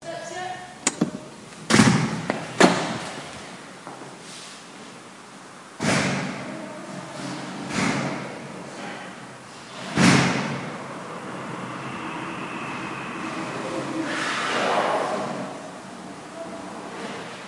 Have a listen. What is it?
MySounds GWAEtoy Stairwell
field, recording, TCR